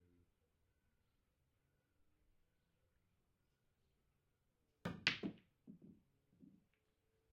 Pool Table Ball Hit
This is of someone hitting a ball on a pool/snooker/billiards table and the sound it makes when the ball sinks in the hole from outside of the table.
Bar, Break, Cue, Eight, Free, Guys, Hit, Men, OWI, Pool, Snooker, Solids, stripes